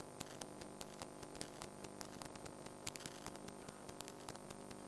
PGJ TelPickupCoil Recording Raw.09
In the spirit of the jam, as a thank you for the chance to be apart of such an awesome event and to meet new incredibly talented people, I decided to give away for free some samples of recording I did of electric current and some final SFX that were used in the game. I hope you find these useful!
electric-current, electricity, hum, noise, telephone-pickup-coil